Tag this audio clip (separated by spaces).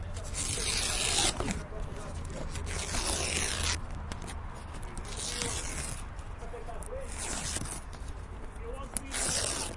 Lama Fieldrecordings